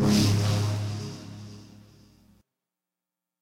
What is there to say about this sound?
Player Spawned
sound effect of a player spawning into the game
game, player, sfx, spawn